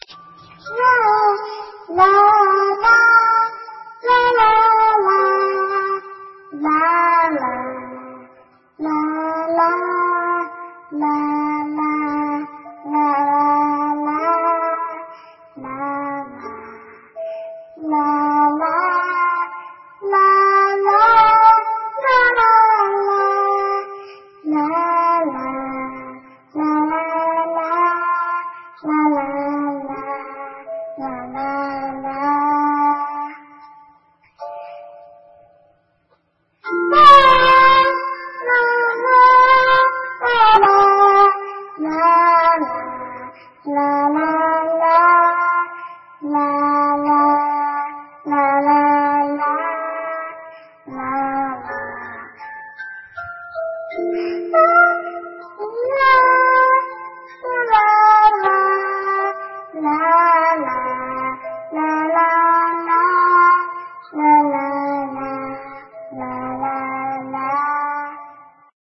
Greensleeves creepy child for rpg
A creepy sound I made with my voice and edited with a voice changer program on my computer.
animation, child, creepy, dark, fear, game, games, Greensleeves, horror, music, rpg, scary, silly, singing, spooky, terror, thrill